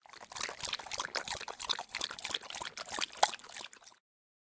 11-Dog drinking
Dog is drinking from bowl